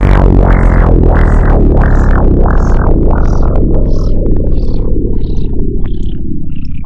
sawtooth-pitch-slide-and-wah-wah-b1-b0
Sound effect or weird sub bass wobble down loop. 4 bars in length at 140 bpm
I used audacity to generate two sawtooth tones with the very low frequency of 30.87 or note B (b0) On one I applied a sliding pitch shift down a full octave which creates a nice wobble down effect, then applied a wah-wah.
bass, down, dub, loop, power, power-down, sawtooth, sci-fi, sub, tech, wah-wah